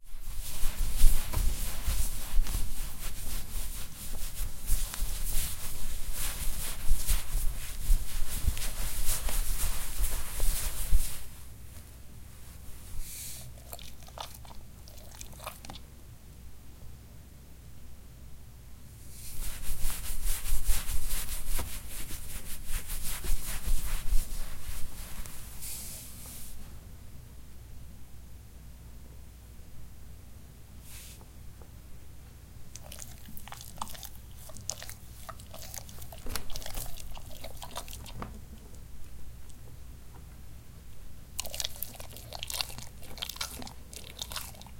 Petting my dog